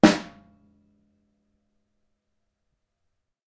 snare - Premier Artist Maple - tight 1
Snare drum hit. Premier Artist Maple snare 14x5.5.
premier, snare, maple, drum, tight